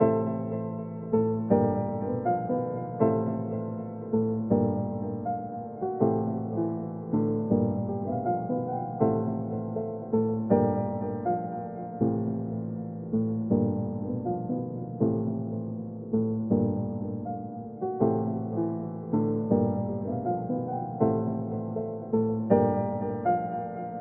Created with the Vita Piano in Music Studio. Lightly processed ... Little melancholic one hits the sound of resignation